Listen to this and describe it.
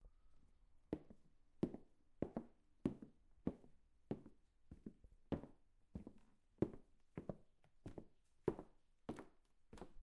Walking on wooden floor
Walking on a wooden floor